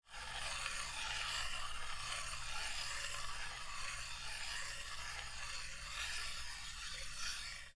07-Moneda Piedra
The sound of a coin that hits stone